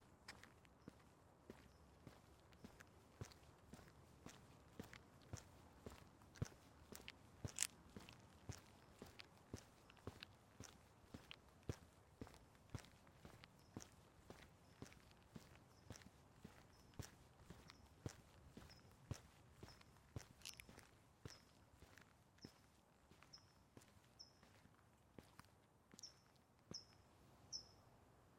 I recorded myself walking on a sidewalk in hard rubber soled boots.
Recorded with: Sanken CS-1e, Fostex FR2Le